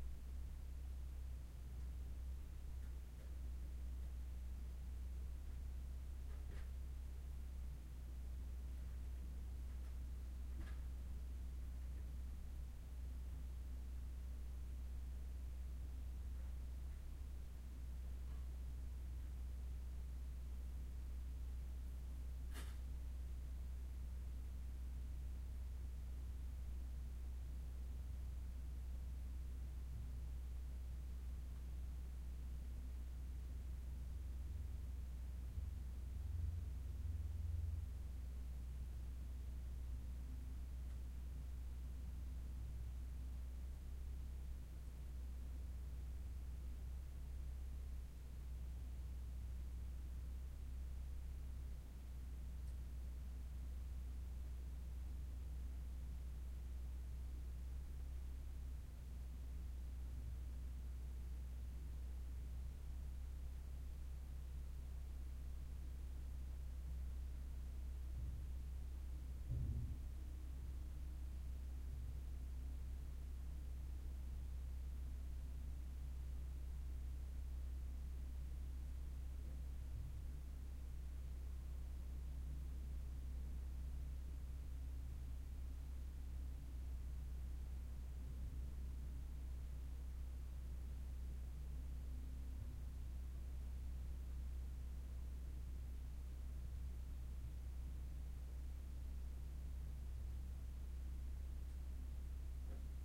Home Ambience 2
Ambience recorded in one of the rooms upstairs with a Zoom H1.